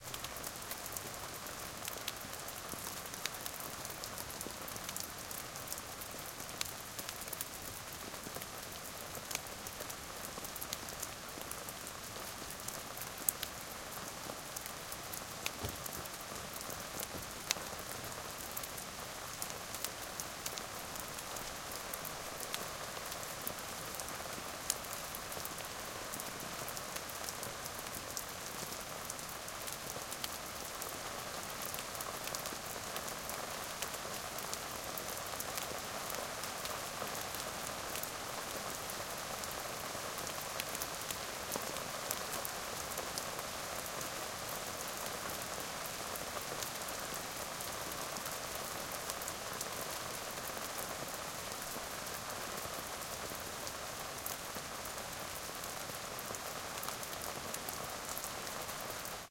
Summer rain recorded in July, Norway. Tascam DR-100.
rain, field-recording